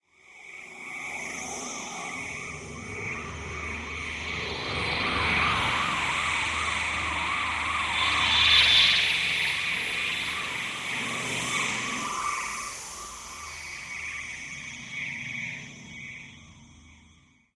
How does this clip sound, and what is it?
abstract modulated ufo atmosphere
alien, ufo